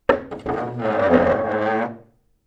wooden.chair.02
that awful sound made when you dragg a wooden chair. RodeNT4>Felmicbooster>iRiver-H120(Rockbox)/el sonido horrible de una silla de madera cuando se arrastra por el suelo
dragging, chair